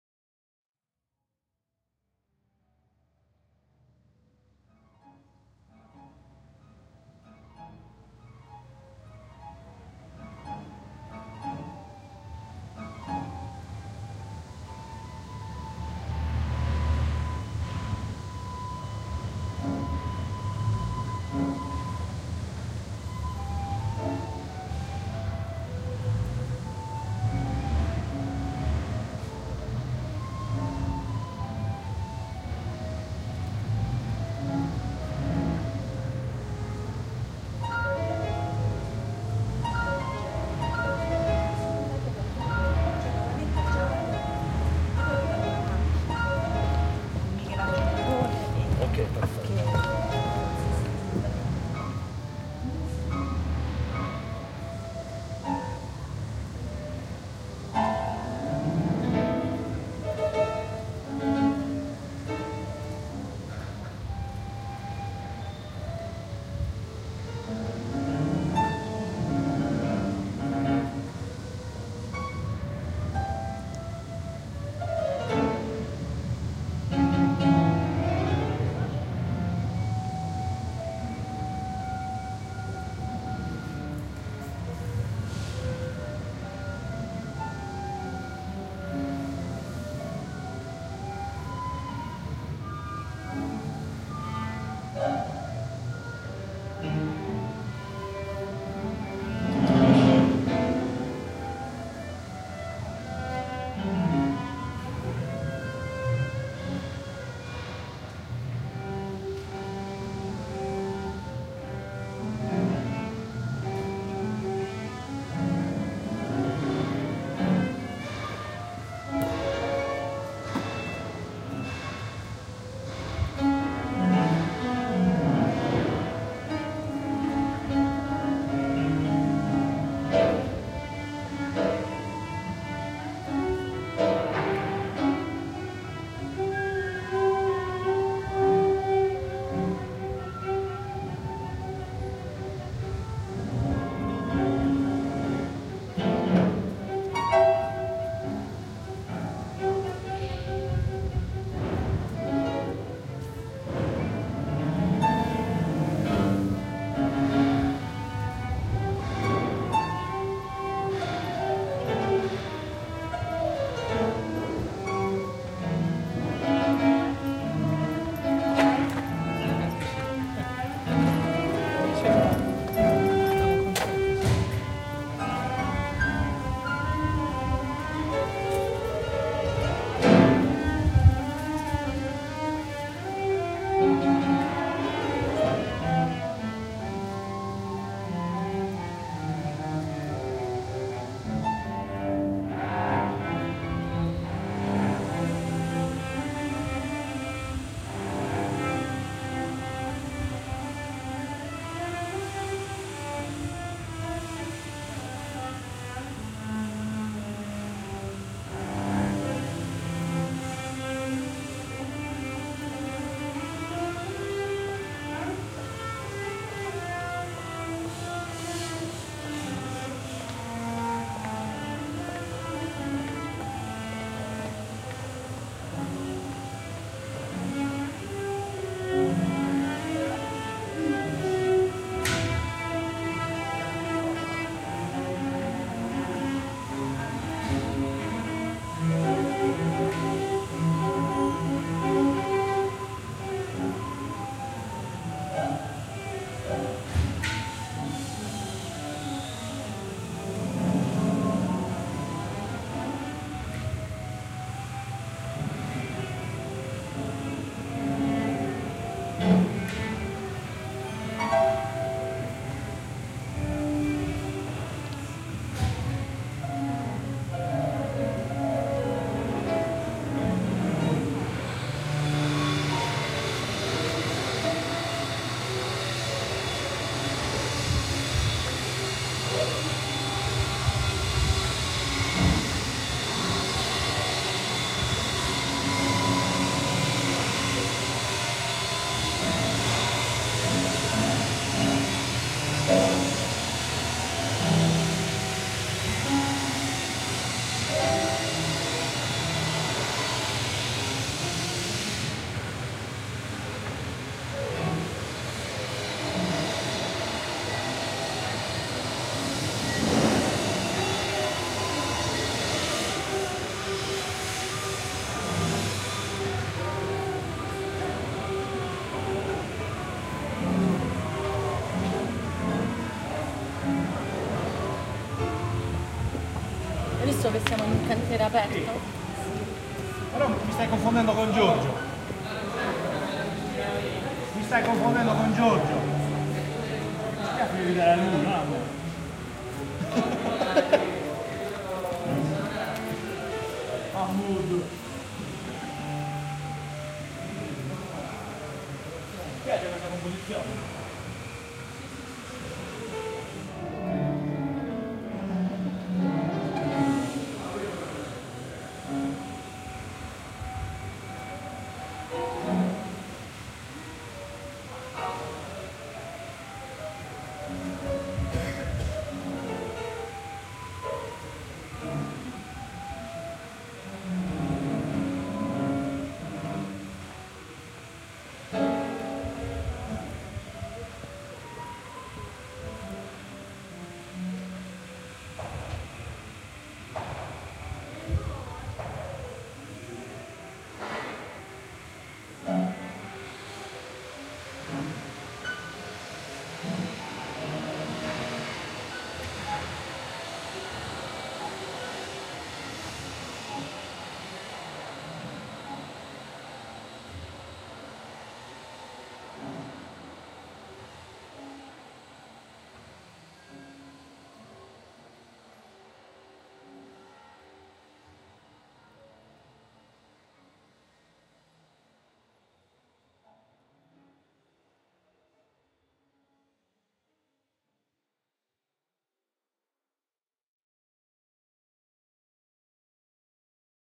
date: 2010, 03th Jun.
time: 10:00 AM
place: Conservatorio "L.Canepa" (Sassari, Italy)
description: Soundscape recorded during "Terra Fertile" electroacoustic italian festival at conservatory. Some people play different compositions creating a strange atmosphere.
flute, city, sardinian-dialect, piano, conservatorio, cello, urban, sassari